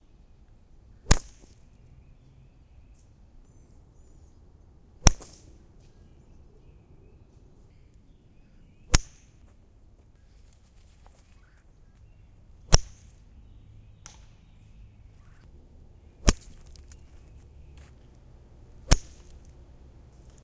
Powerful golfball hits
Equipment: Tascam DR-03 on-board mics
Some close range golf ball hits.